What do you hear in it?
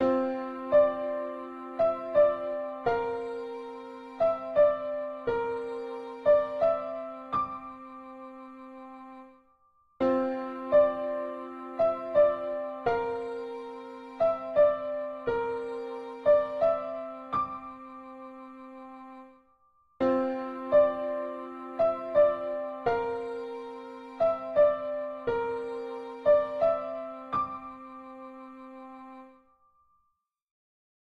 reverberated sad happiness loop
happy, sad, loop